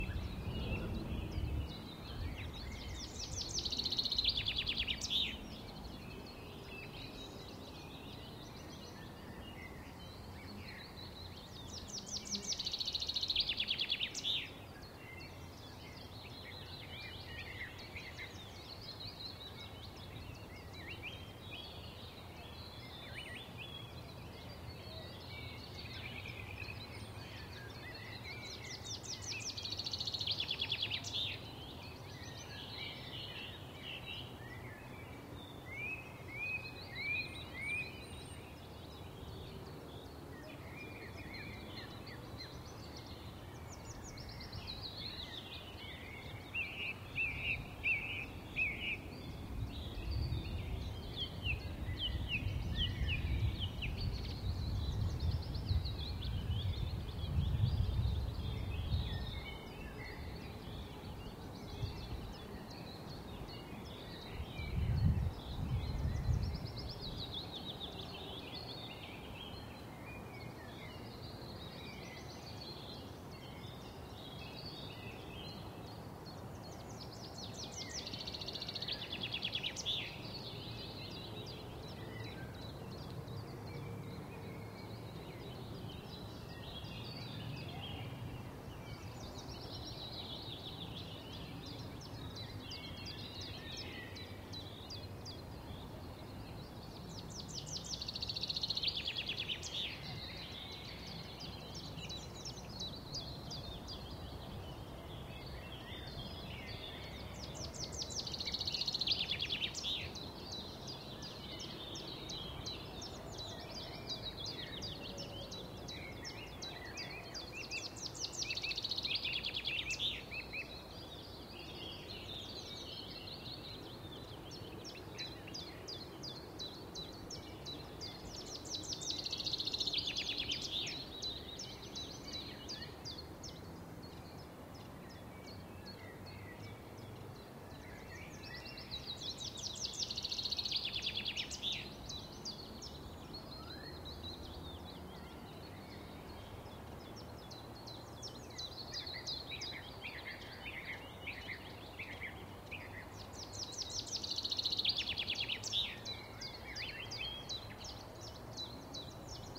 Birds singing in spring.
It would be nice if you add me to your Credits: